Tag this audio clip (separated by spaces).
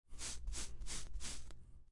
old rupestre Campo Rustico antiguo